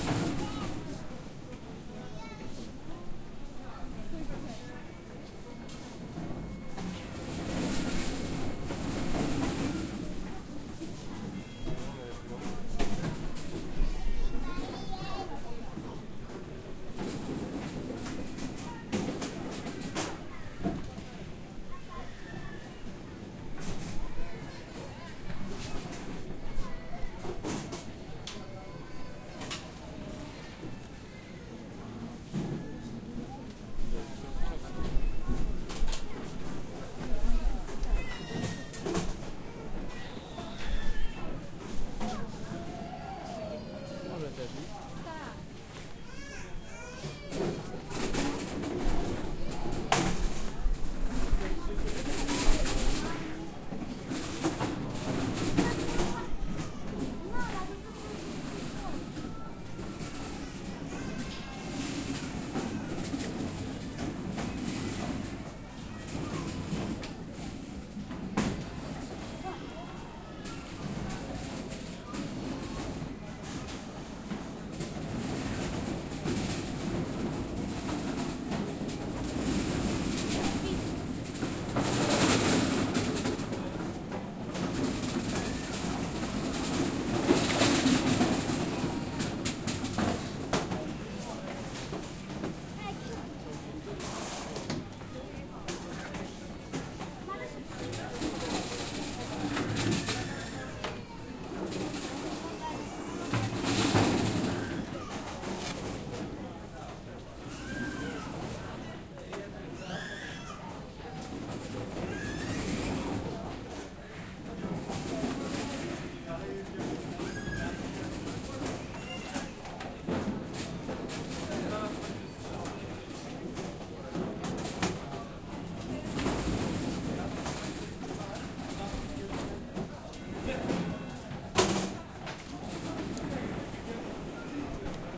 zone de securite
filed-recording, Kalina, machine